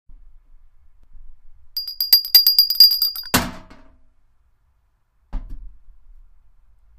Bell, ringing, ring